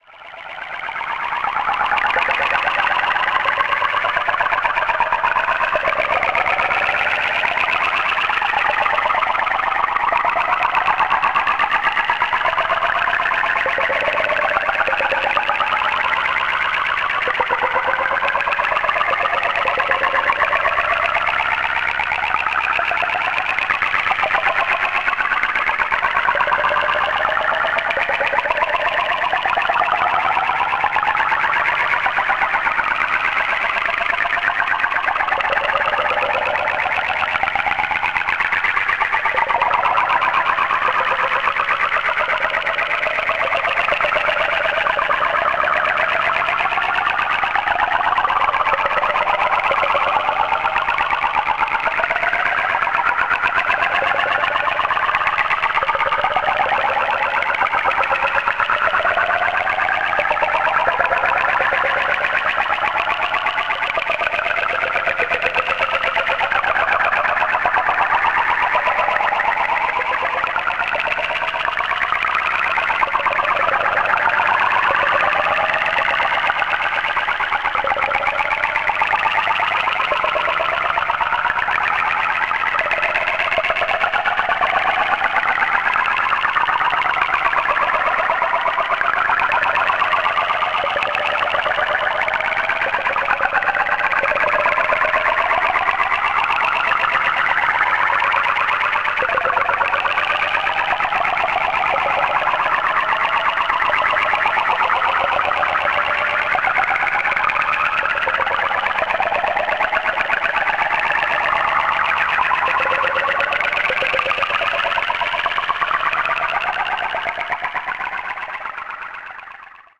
This sample is part of the "Space Machine" sample pack. 2 minutes of pure ambient deep space atmosphere. Weird space droplets, a bit watery or percussive.
ambient, drone, experimental, reaktor, soundscape, space